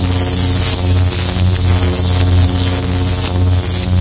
A sound I made on my Korg Electribe SX
sx, electribe